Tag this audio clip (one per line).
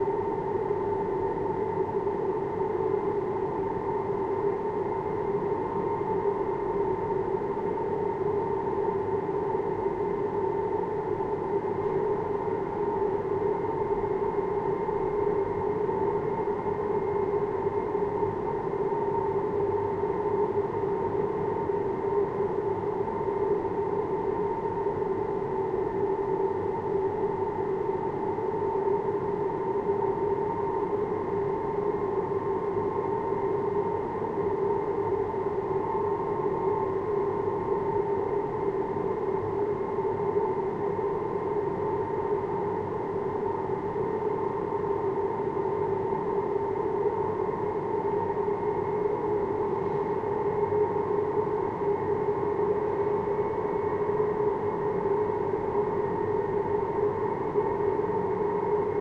draft
drone
entrance
hallway
night
wind
winter